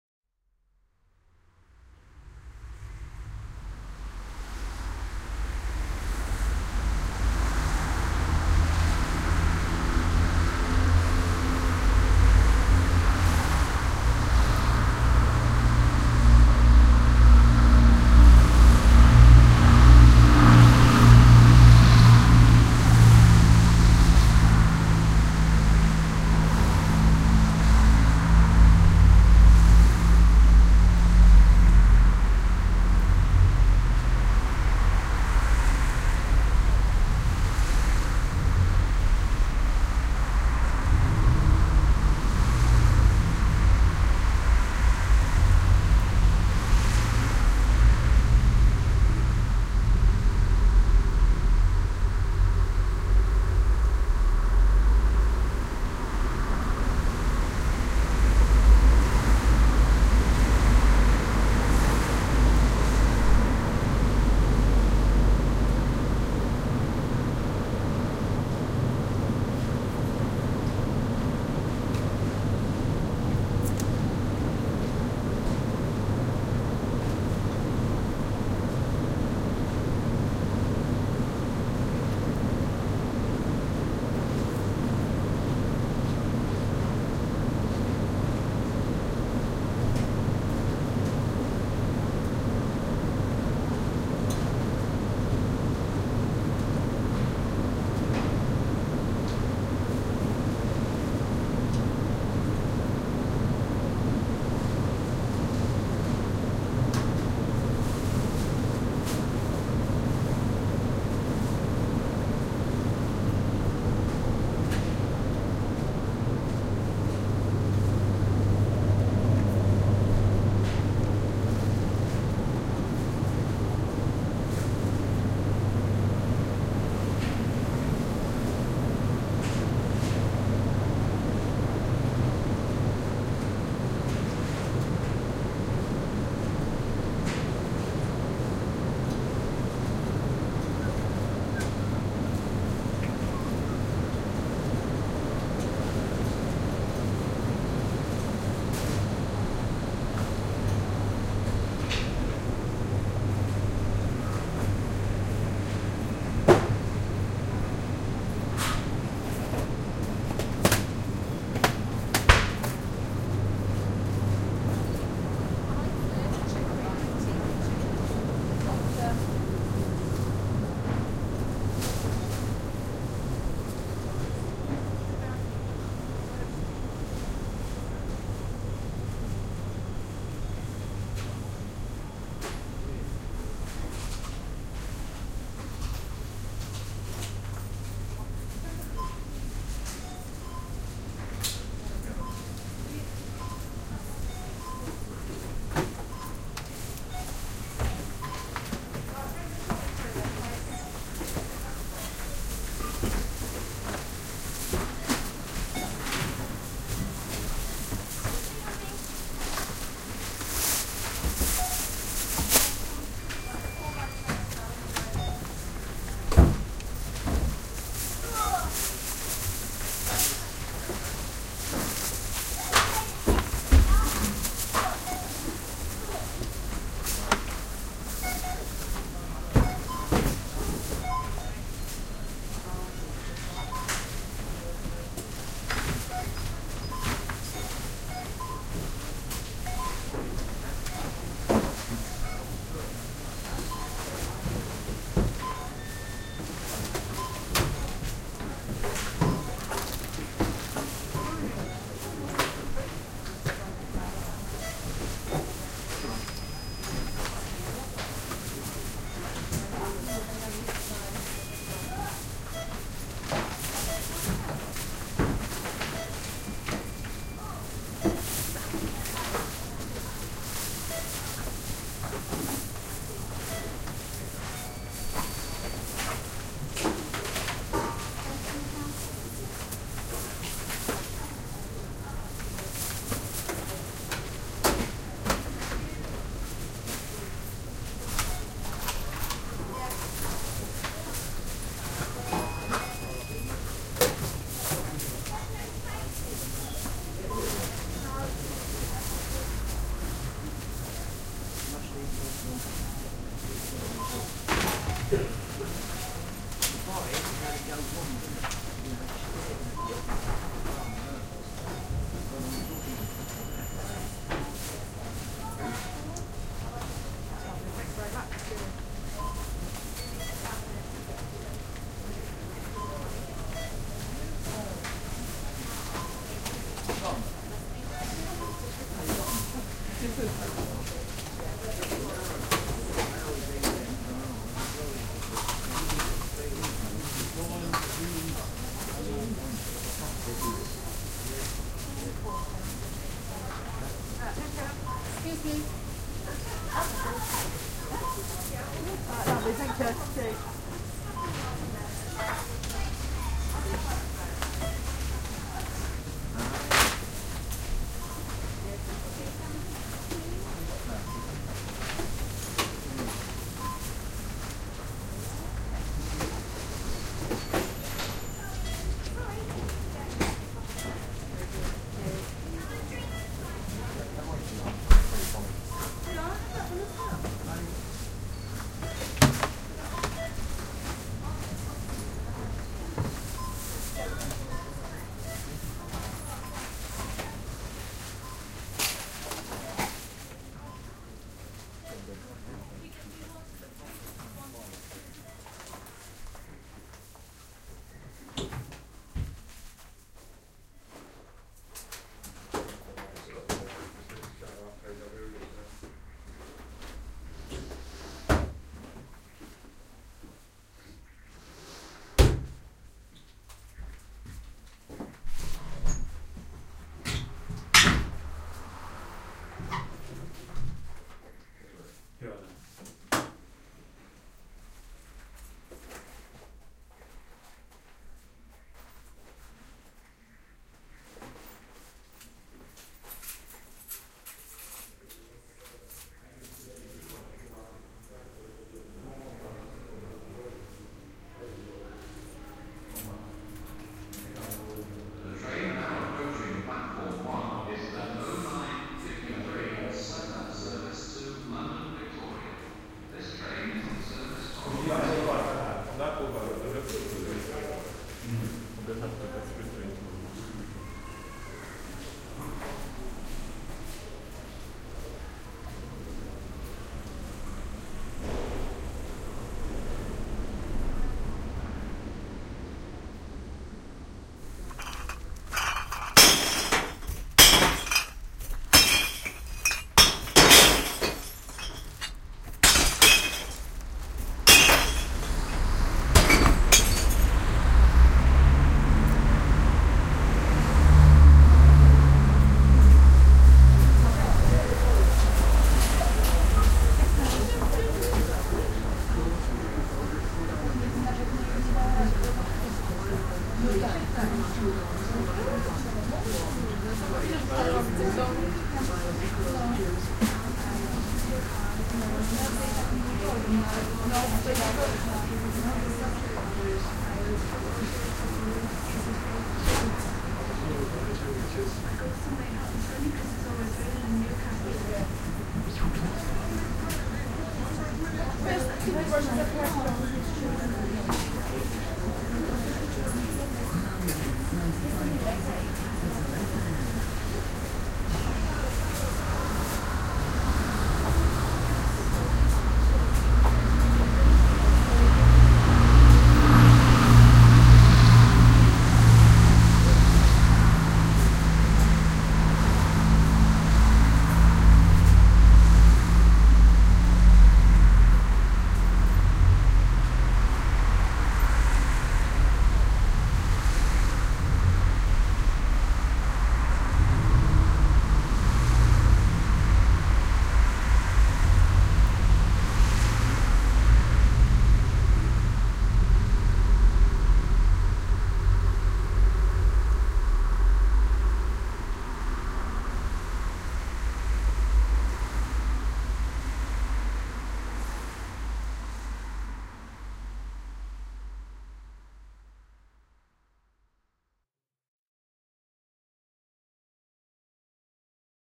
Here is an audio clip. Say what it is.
cm south london binaural 2005
Binaural recordings made in South London, Autumn 2005. Home-made stealth binaural mic/headphones, Sony MZ-R37 Mini-Disc recorder.
london, field-recording, binaural